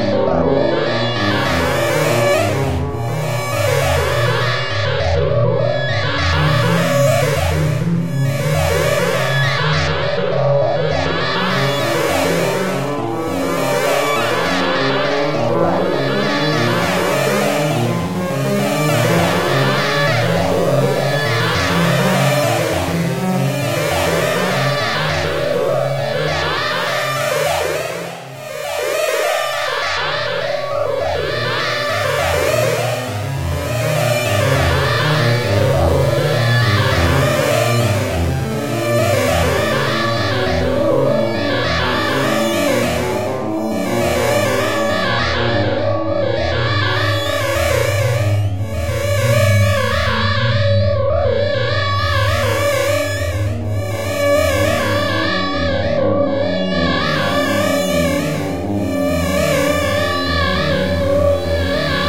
VCV Rack patch